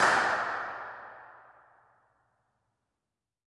IR clap small Hexagonic Chapel hi-pitch
Clap in a small Hexagonic chapel near Castle Eerde in the Netherlands. Very useful as convolution reverb sample.
Chapel, clap, Hexagonic, impulse, impulse-response, IR, response, small